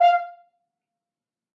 One-shot from Versilian Studios Chamber Orchestra 2: Community Edition sampling project.
Instrument family: Brass
Instrument: F Horn
Articulation: staccato
Note: E#5
Midi note: 77
Midi velocity (center): 31
Microphone: 2x Rode NT1-A spaced pair, 1 AT Pro 37 overhead, 1 sE2200aII close
Performer: M. Oprean
brass, esharp5, f-horn, midi-note-77, midi-velocity-31, multisample, single-note, staccato, vsco-2